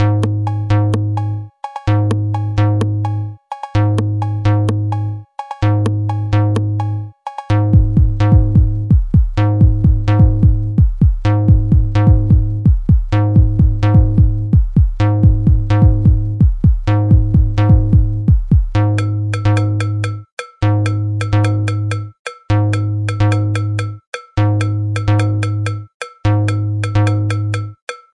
This is my first beat. Enjoy.
First-Beat, song